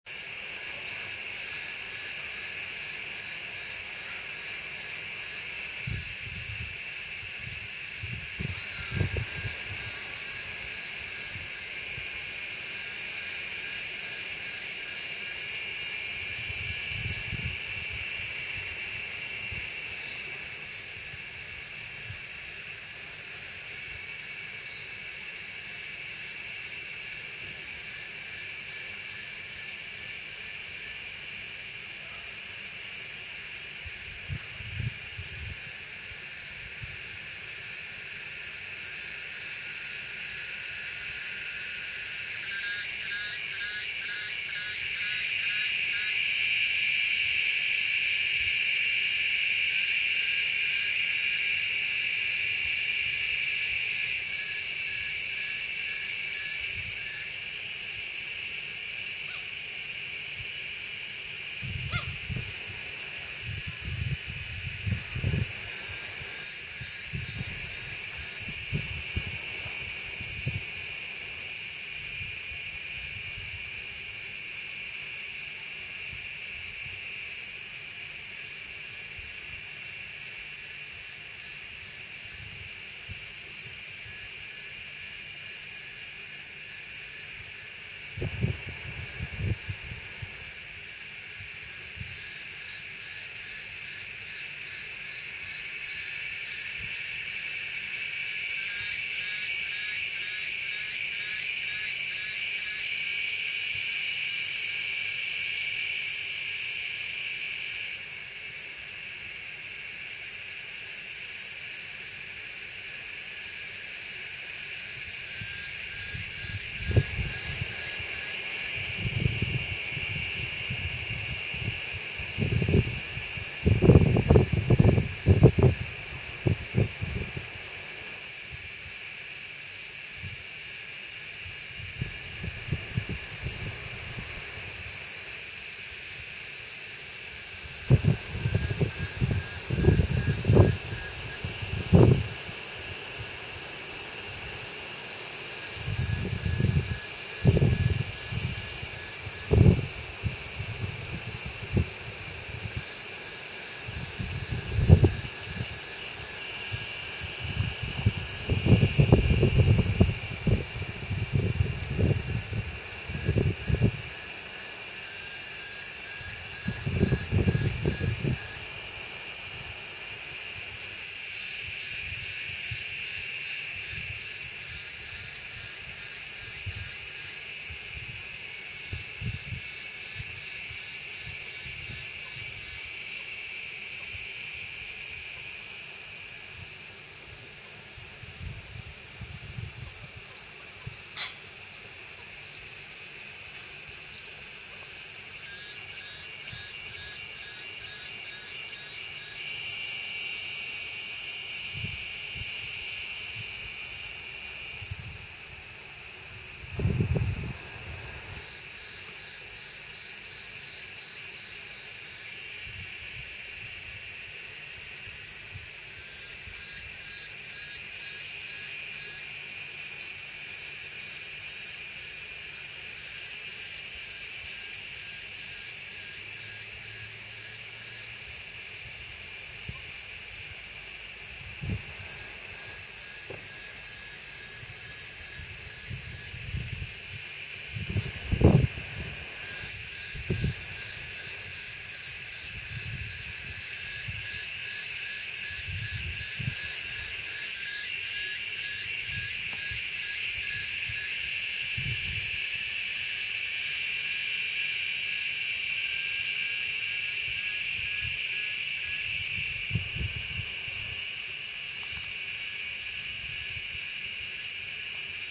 Summer Morning
When the air is dry and hot, insects (that I believe are cicadas) chirp loudly from every direction while being too small for anyone to spot. Their sound, to me, is a sign and reminder of the hot, dry air of Australian summer.